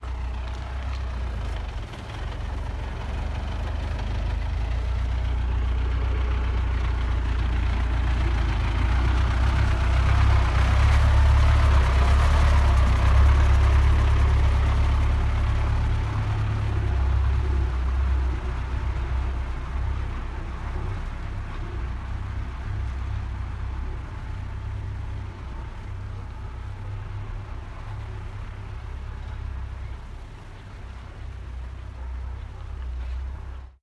Foley, Village, A Car, Passed By
Car, Public, Motor, Pass, Road, Countryside, Passing, Drive, Ride, Transport